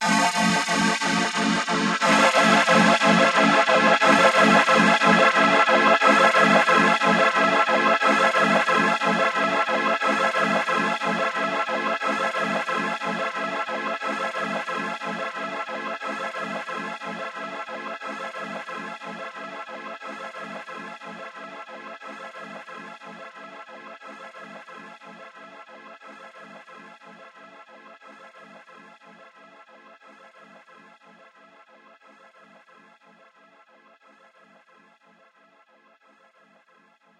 Create011D Pink Extreme
This Sound Was Created Using An FM7 Program Keyboard. Any Info After The Number Indicates Altered Plugin Information. Hence A Sound Starts As "Create" With A Number Such As 102-Meaning It Is Sound 102. Various Plugins Such As EE, Pink, Extreme, Or Lower. Are Code Names Used To Signify The Plugin Used To Alter The Original Sound. More That One Code Name Means More Than One Plugin.
Ambient, Electronic, FM7, Mood, Self-Created